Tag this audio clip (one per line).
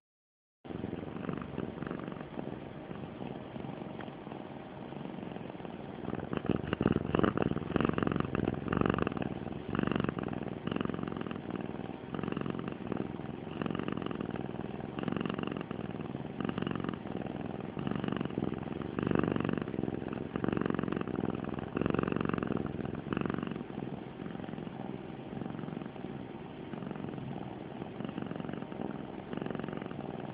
animal
cat
fx
house-cat
house-pet
kitteh
kitten
kitty
pet
purr
purring